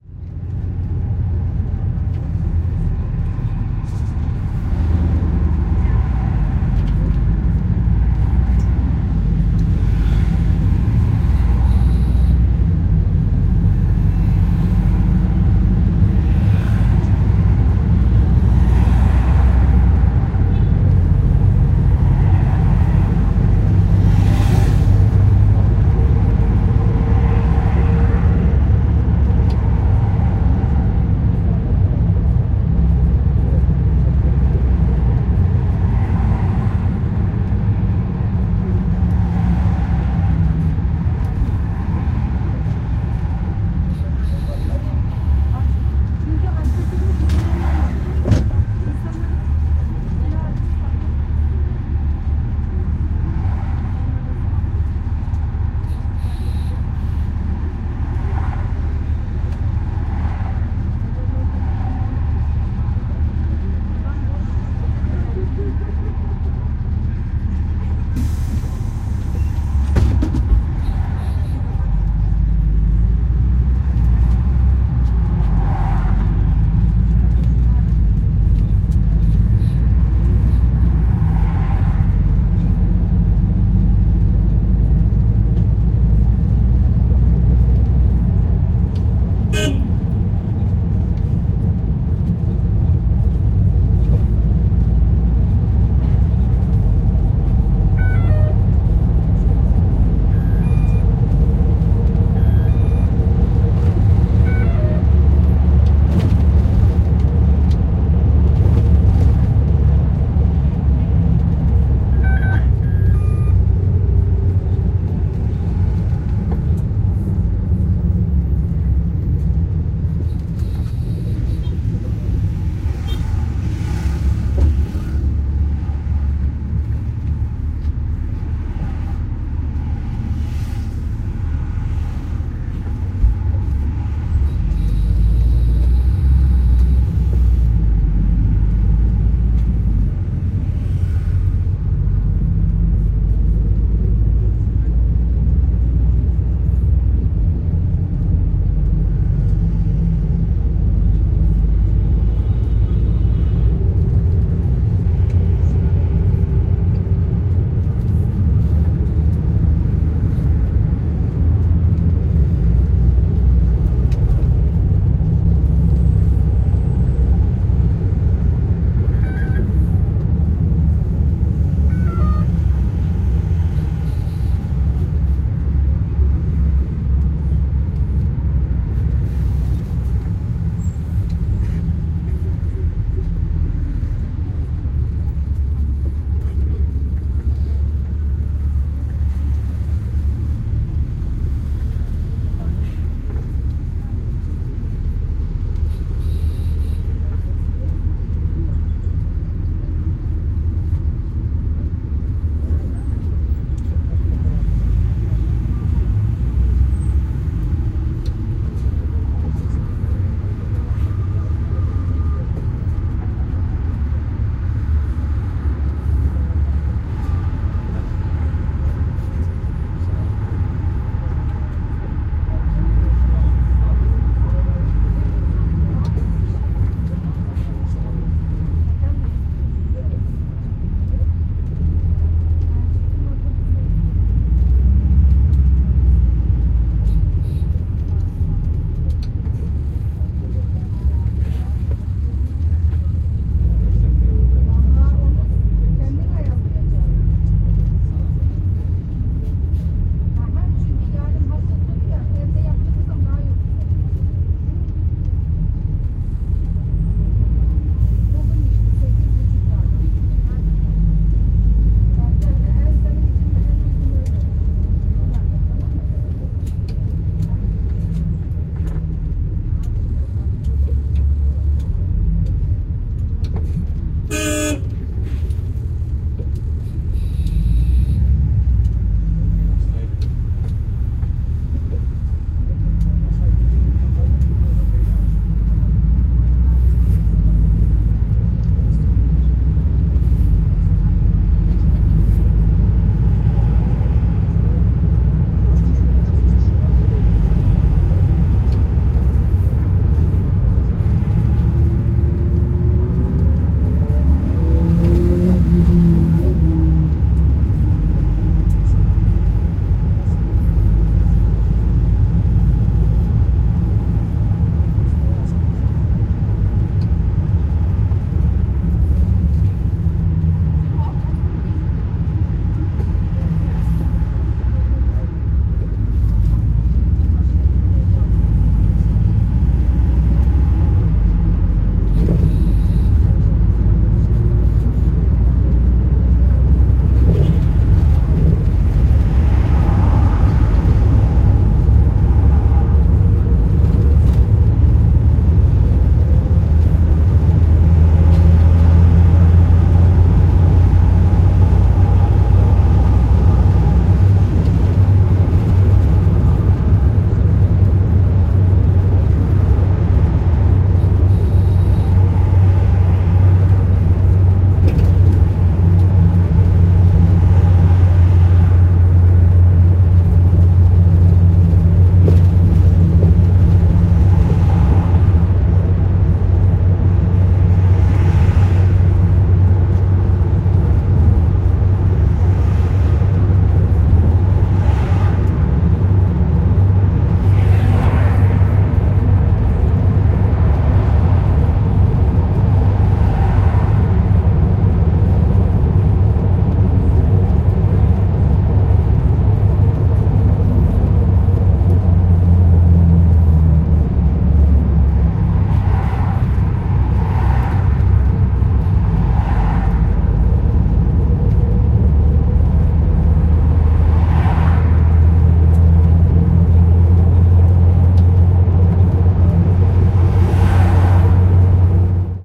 This is the recording I made while traveling in a bus in 500t. 500t is one of the longest bus routs in İstanbul.